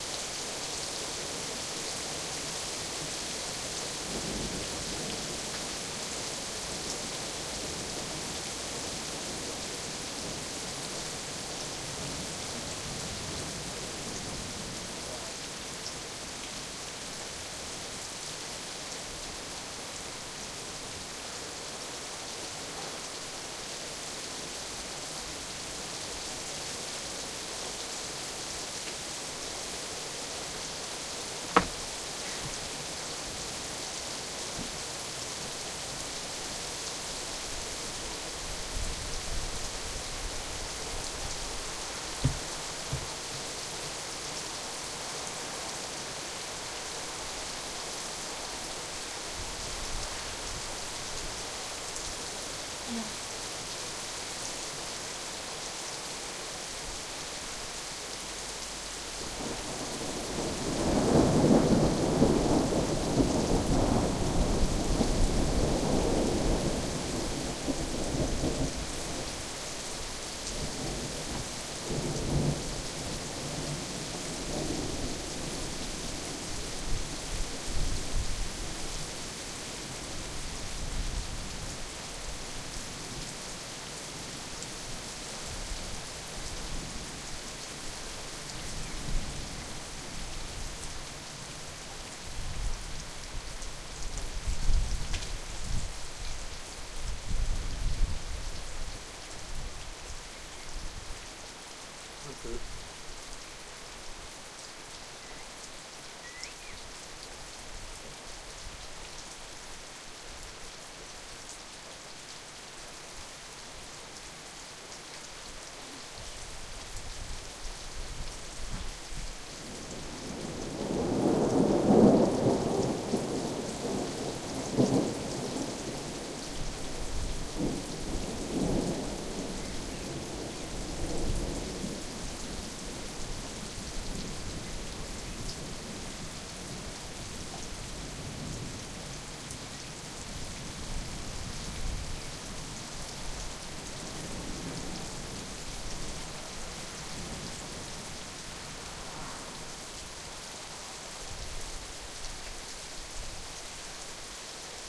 rain and thunder 1
Thunderstorm in the countryside near Lyon (France). Recorded with a Zoom H2, edited in Ableton Live 8.